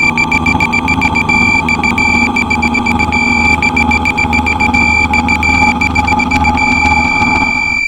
A complicated call signal,three others of my sounds integrated. If you are sitting in the airport lounge and this signal is suddenly switched on, the nearest guys will drop the sandwich in the knee.